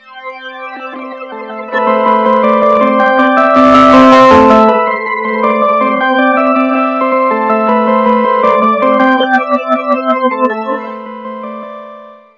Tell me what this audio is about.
Mind Ambient 15
This is the Nord Lead 2, It's my new baby synth, other than the Micron this thing Spits out mad B.O.C. and Cex like strings and tones, these are some MIDI rythms made in FL 8 Beta.
idm, rythm, glitch, ambient, melody, background, nord, soundscape, backdrop, electro